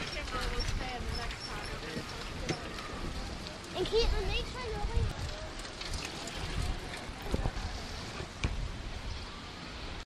newjersey OC boardwalk mono
Monophonic recording of boardwalk recorded with DS-40 and edited in Wavosaur.
vacation, monophonic